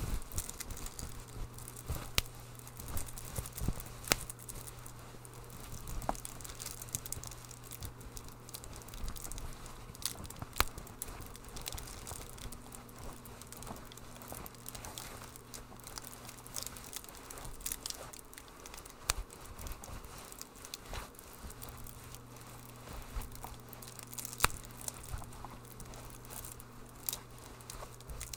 This is a foley fireplace. Recorded with the Blue Snowball iCE in audacity. I layered the sounds together using audacity. This recording consists of two tracks of a smarties candy wrapper (for the crackling), one track of sticky-tac being pulled apart (the popping), and one track of jeans being rubbed together for some extra sound. Enjoy!